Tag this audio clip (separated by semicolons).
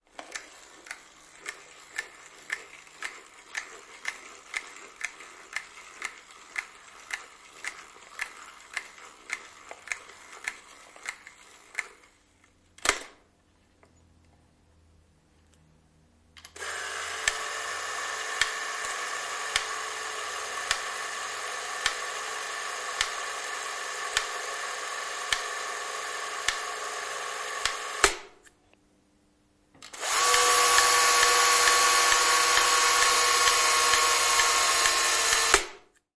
Kuvata; Kaitafilmikamera; Old; Tehosteet; Veto; Cine-camera; Yle; Finnish-Broadcasting-Company; Kuvaus; Finland; Field-Recording; Yleisradio; Film-camera; Suomi; Interior; Vanha; Soundfx; Run; Shooting; Kamera; Home-movie-camera; Roll; Shoot; Camera